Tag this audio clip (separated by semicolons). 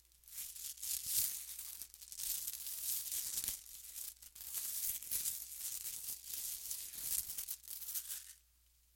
foley; chain